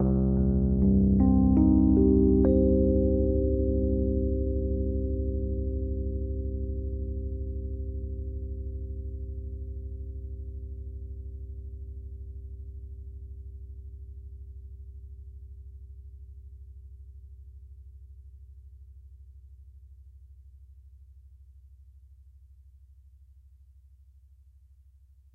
rhodes mystery bed 9
Arpeggio chord played on a 1977 Rhodes MK1 recorded direct into Focusrite interface. Has a bit of a 1970's mystery vibe to it.
vintage, rhodes, electric-piano, chord, suspenseful, mysterious, keyboard, electroacoustic